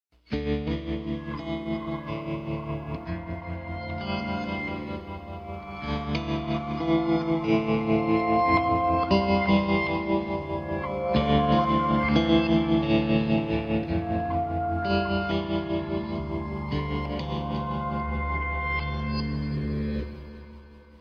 loop and meander
a little guitar loop I improvised